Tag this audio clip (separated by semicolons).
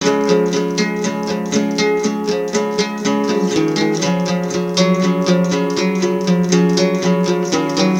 synth; original-music; free; bass; drum-beat; Folk; rock; guitar; voice; piano; percussion; drums; loop; loops; acoustic-guitar; beat; vocal-loops; harmony; sounds; melody; indie; whistle; samples; acapella; Indie-folk; looping